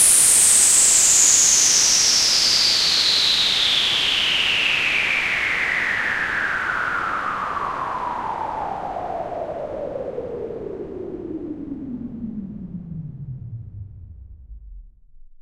White Noise (High to Low)
a, cutoff, high, low, simple, whitenoise